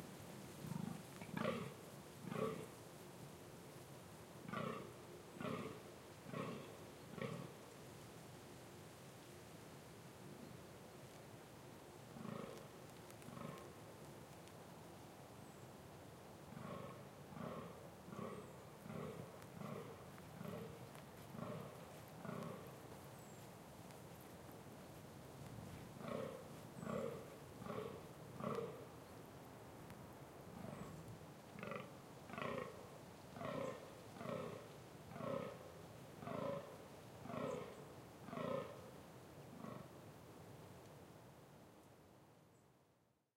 Roaring deer in mating season
Field recording in the "Amsterdamse Waterleiding Duinen" - august 2011. A rather close-by deer was roaring for a mate.
ambience, deer, dunes, mating, roaring, season